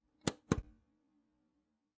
PC, monitor button
Pushing the monitor button.